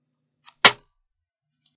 paper drop
drop, foley